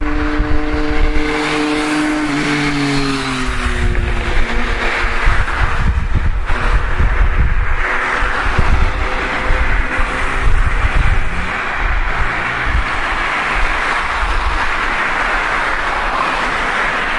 Carretera Roses Opel
This sound was recorded by an Olympus WS550-M. This sound is the traffic in the road in the rush hour.